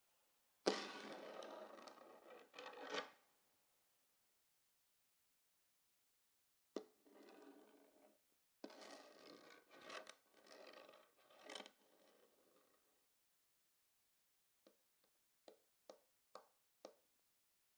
Scratching and Clawing
Scratching a wooden surface
wooden, Scratching, tap, tapping, clawing, knock